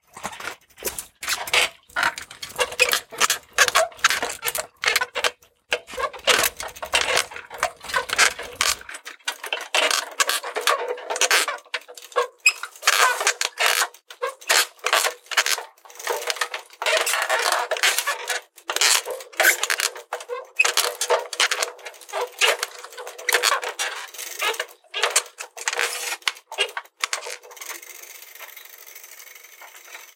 Squeaky Rattling Bike
Borrowed my host's bike in Bremen and just had to record all the chaotic sounds it made.
If my sounds have been useful, you can support me and receive a 1.6GB collection of recordings.